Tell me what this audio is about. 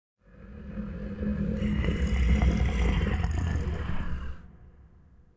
Gruñido de Rata-Humano (Monster/Mutant). Zhile Videogame.
bite, dientes, Gru, ido, mordida, saliva